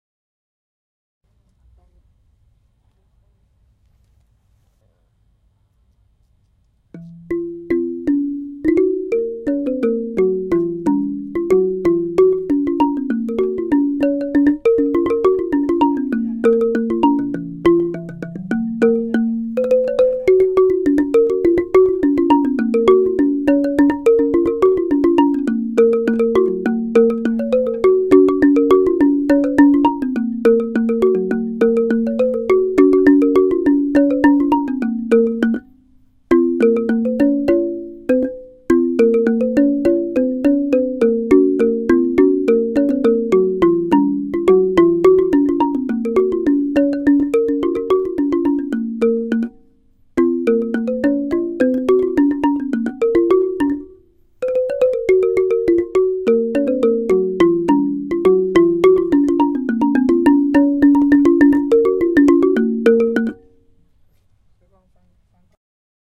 Ranaat (ระนาด) 2
Sample of ranaat (ระนาด) instrument. Unknown studio microphone, no stereo. Record for iOS app 2010.09.28.
ranaat, south-east-asia